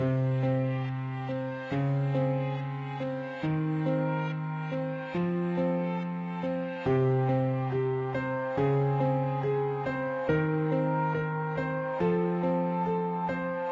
4Front Piano + rev 70bpm
A test of 4Front Piano VSTi with some equalization. A sound is pretty unnatural so I’ve decided to add some backward motion...
piano, 70bpm, loop, backwards, reversed, reverse, 70-bpm